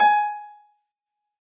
Piano ff 060